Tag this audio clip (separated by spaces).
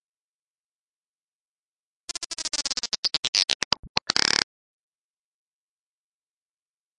warped grains stretch